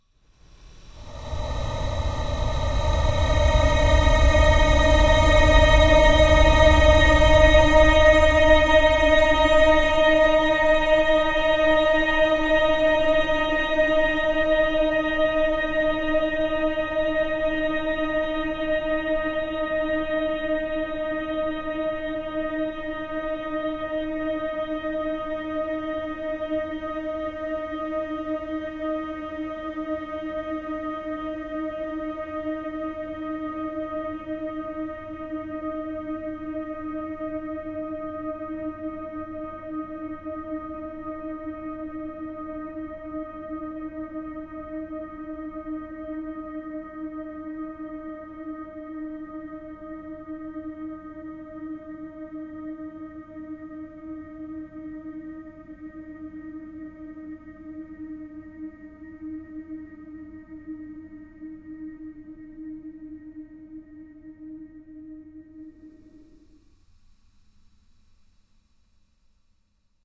ambience 03 lightgrey
Sound 3/4, the second highest note - thus light grey, of my epic ambience pack.
Created in Audacity by recording 4 strings of a violine, slowing down tempo, boosting bass frequencies with an equalizer and finally paulstretch. Silence has been truncated and endings are faded.
thrill, ambient, dramatic, atmosphere, suspense, drone, deaf, speechless, atmos, ambiance, tension, space, ambience, numb, soundscape